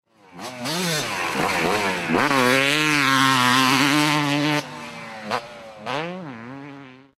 YZ250-turn3-jump
yz250 turning on mx track
motorbike, yz250, dirt-bike, motorcycle